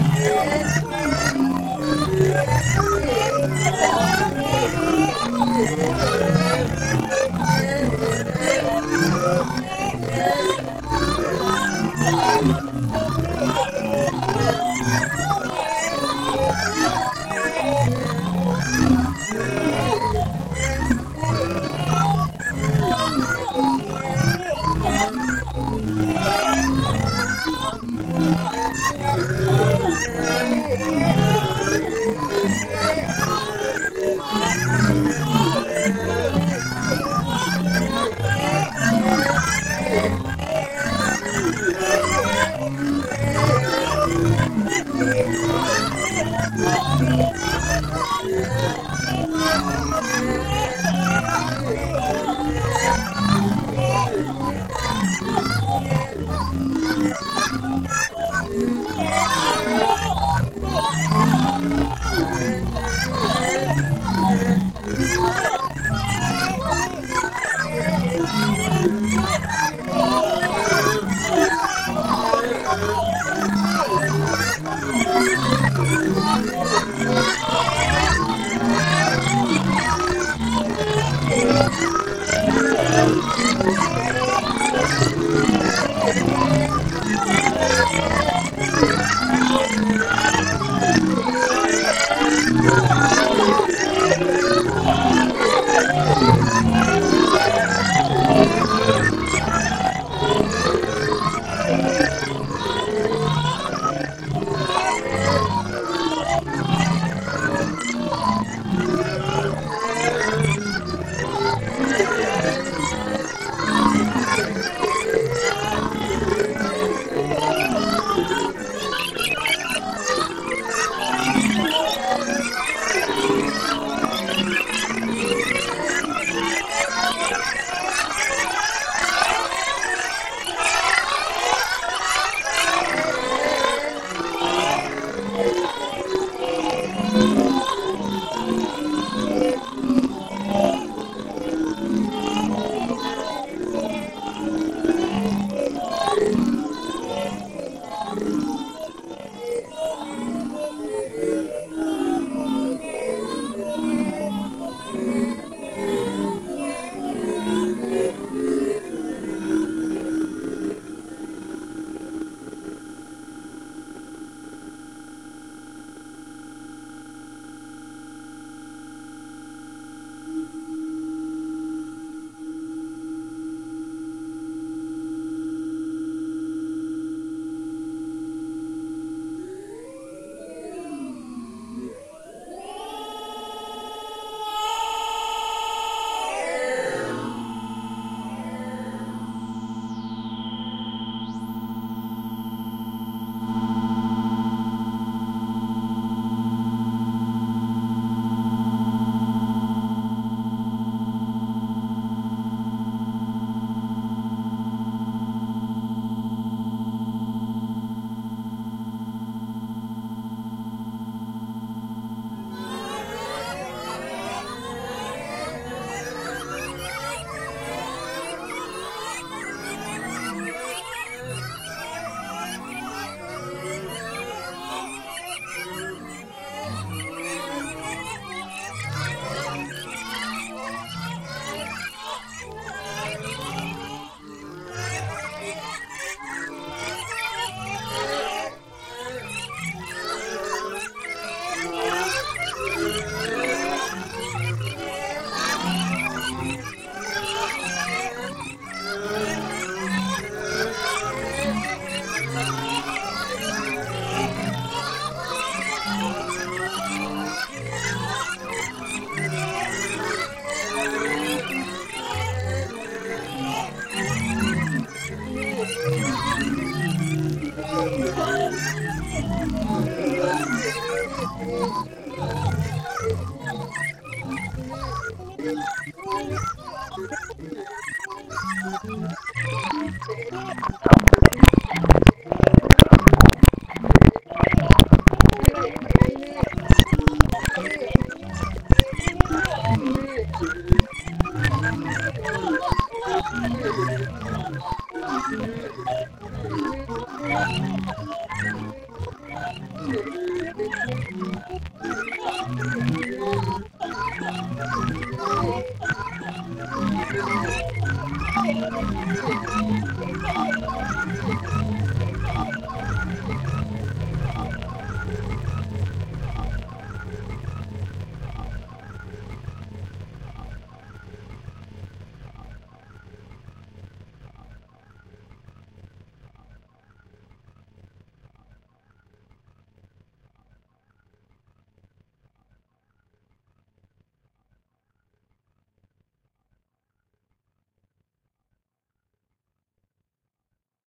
This is a mangled manipulation of one of my weird vocal noises on here called retarda something.